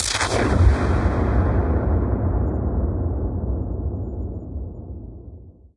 Brushy explosion component
explosion, brush, jingle, component